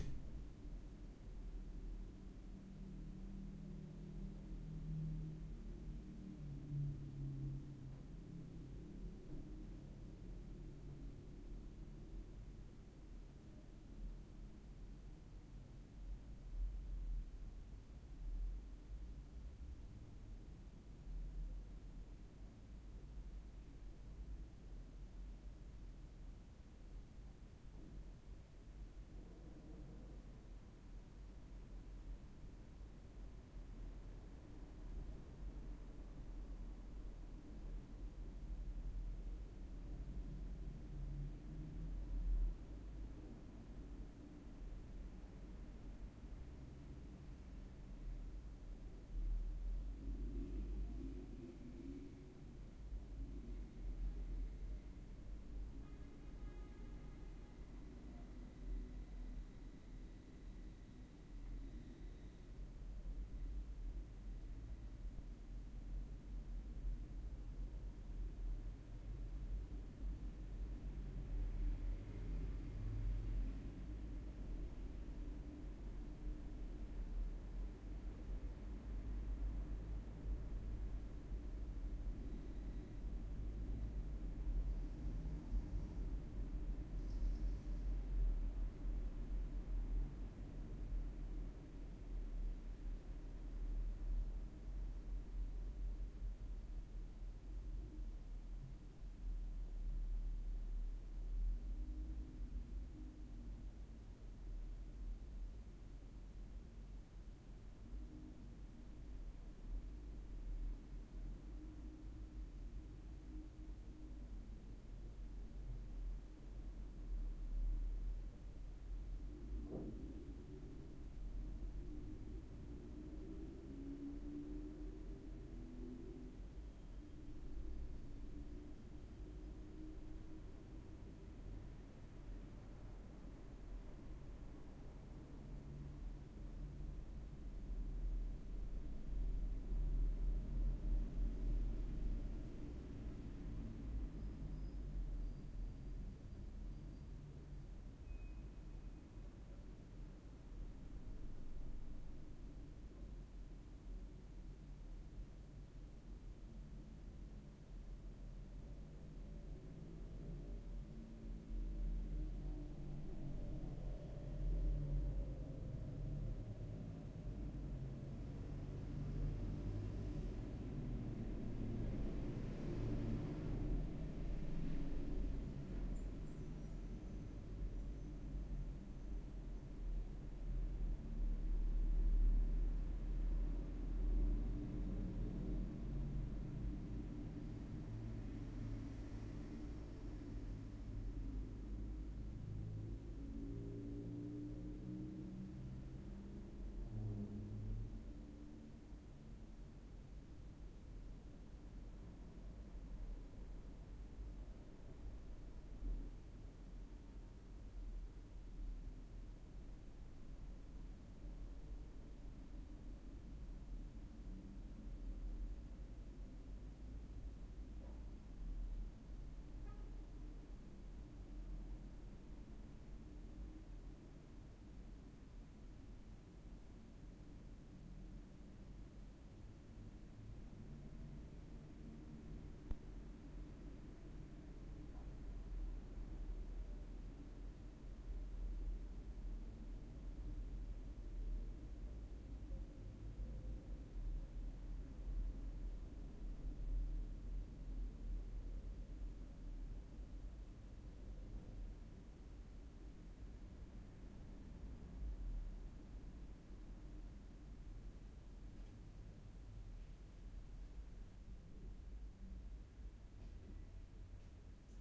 Room tone habitación
room tone de habitación en departamento.
ambience, bedroom, city, indoor, room-tone, roomtone